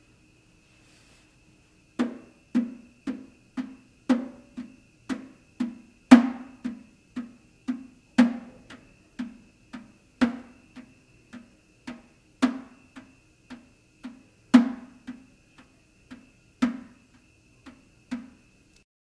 Trash Can Tap
Me gently tapping on a trash can with a wooden spoon.
can, MTC500-M002-s13, tap, trash